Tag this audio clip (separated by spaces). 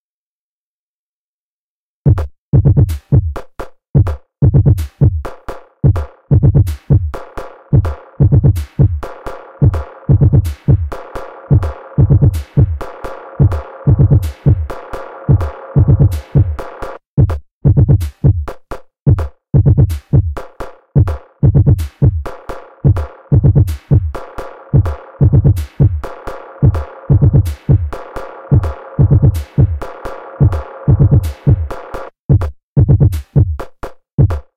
produccion techno dance house sintetizador loop experimental